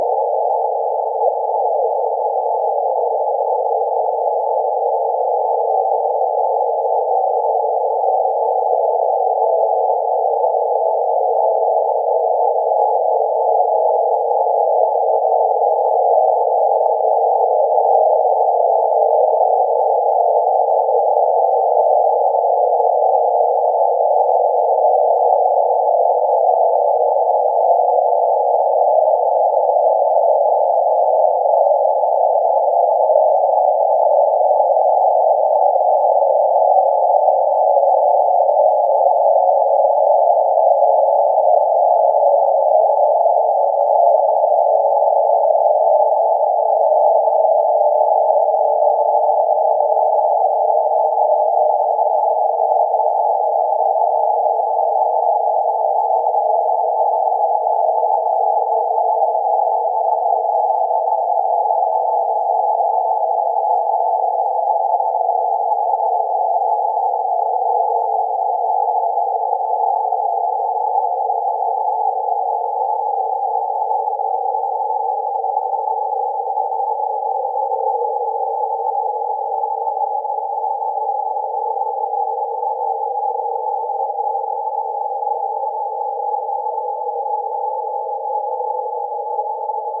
IMG 4340 1kla
the sample is created out of an image from a place in vienna
image, processed, synthesized, Thalamus-Lab